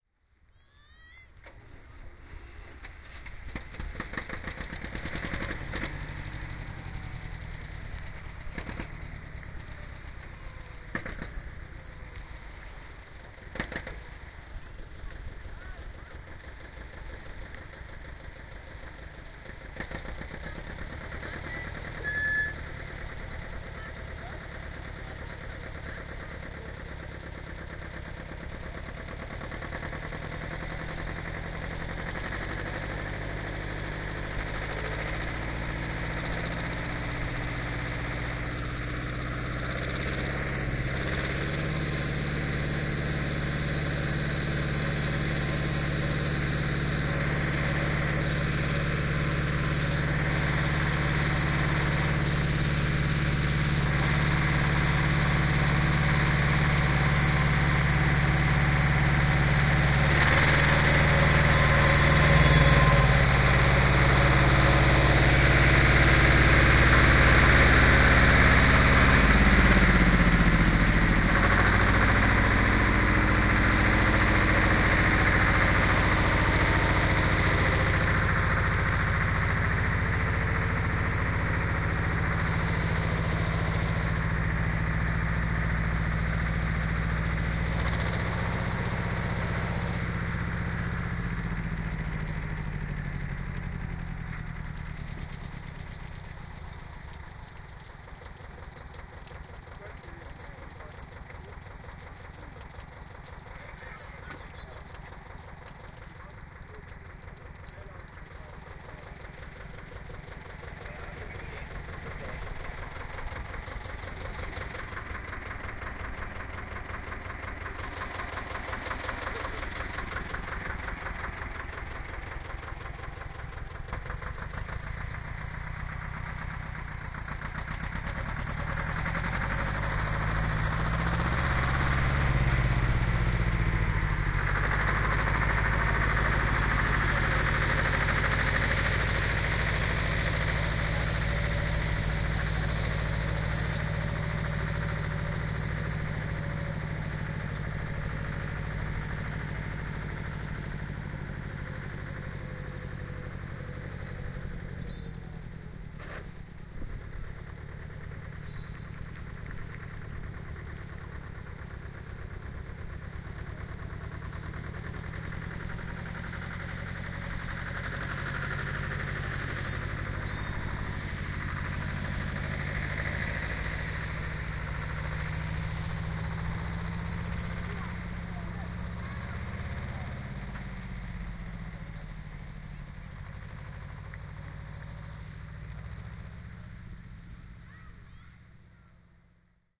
DLRG Trecker am Rhein
a tractor of the DLRG organisation pulling out a little motorboat from the river rhine in cologne in summer 2008.
field-recording, motor, rhine, tractor